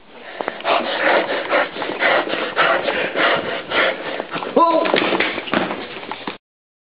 Tom and Billy running in a corridor. Tom then falls over to make a weird noise.
cunch, hurt, not, over, panting, running, tom